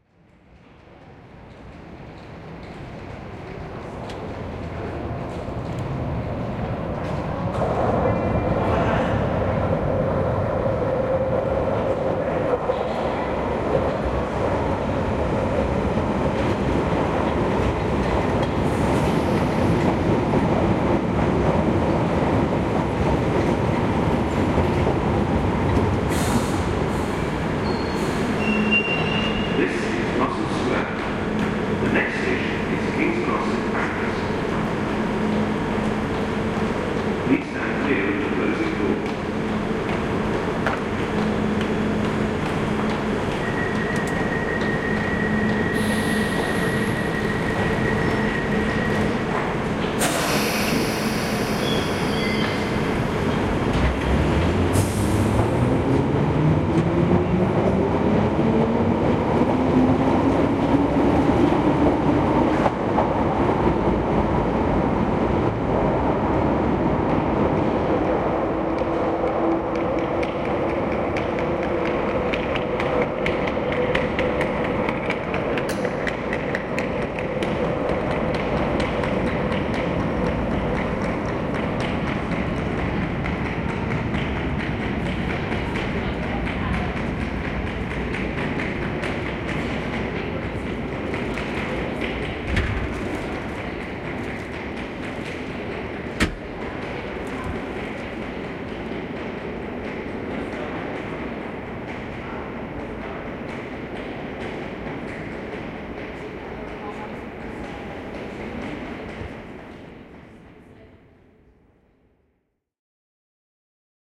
808 Russell Square train 2
A tube train arrives and leaves. The classic announcement "please stand clear of the closing doors". The sound of a suitcase being wheeled along the platform. Recorded in the London Underground at Russell Square tube station.